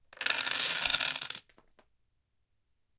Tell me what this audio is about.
dominos in a row
A row of dominoes set up in a row and knocked over - stereo - from one side to the other.
Recorded with a Sony ECM-99 stereo microphone to SonyMD (MZ-N707).